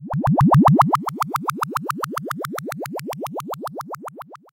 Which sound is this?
Cartoon, Drowning 01
Cartoon, Drowning.
If you enjoyed the sound, please STAR, COMMENT, SPREAD THE WORD!🗣 It really helps!
More content Otw!